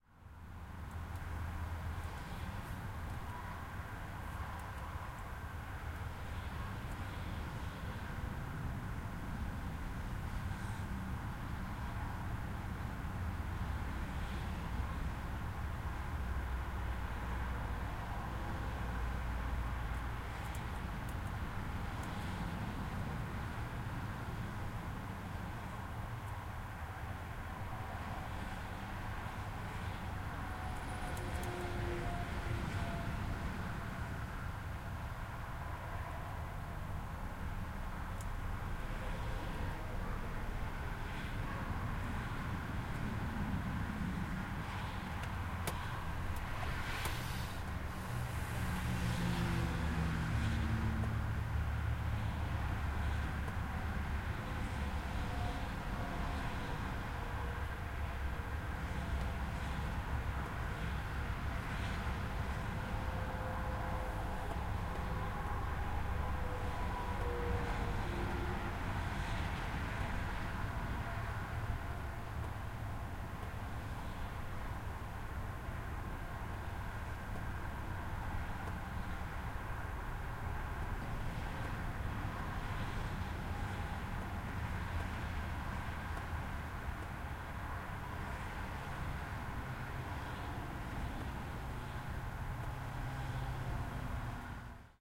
19.08.2011: twentieth day of ethnographic research about truck drivers culture. about 15 km from Hamburg in Germany in Bremen direction. car/truck park. Ambience: sound of traffic. Recording inside of truck cab. We are waiting for another truck to exchange our truck caravans.
ambience, cars, field-recording, motorway, traffic, trucks